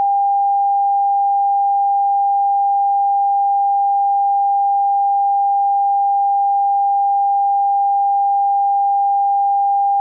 800hz sine wave sound